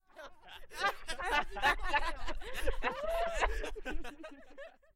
RAMASSAMY ASHOK discomfort laughs
Laughs with Wahwah effect. It make a different sensation. Disconfortable ...
Normalized.
disconfort, disconfortable, funny, laugh, laughing, laughter, sick, strange